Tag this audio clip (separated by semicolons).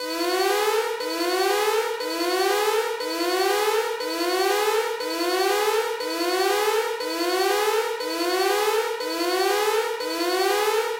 Alerts; Error; Scifi; Buzzers; Alarms; Warning; Space